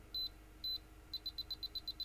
Bomb Countdown Beeps
The countdown beeps of a bomb about to go off.